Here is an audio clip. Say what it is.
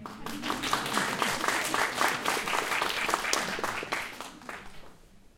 small group applause 3
About twenty people clapping during a presentation.Recorded from behind the audience using the Zoom H4 on-board microphones.